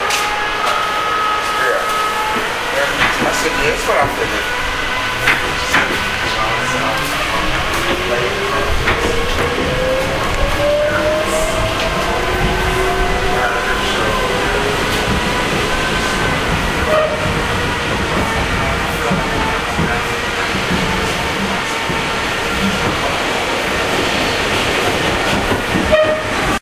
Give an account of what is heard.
Subway train accelerating up to speed, general hum.
accelerate, hum, subway